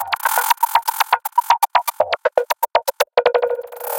20140306 attackloop 120BPM 4 4 23rd century loop3f
This is a variation of 20140306_attackloop_120BPM_4/4_23rd_century_loop1 and is a loop created with the Waldorf Attack VST Drum Synth. The kit used was 23rd century Kit and the loop was created using Cubase 7.5. The following plugins were used to process the signal: AnarchRhythms, StepFilter, Guitar Rig 5 and iZotome Ozone 5. The different variants gradually change to more an more deep frequencies. 8 variations are labelled form a till h. Everything is at 120 bpm and measure 4/4. Enjoy!
drumloop, rhythmic, beat, electro, loop, granular, electronic, dance, 120BPM